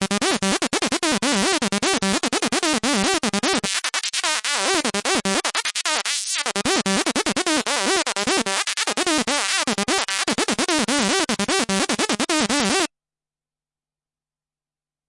plastic lead
i made it with analog gear
electronic, loop, synth, techno, trance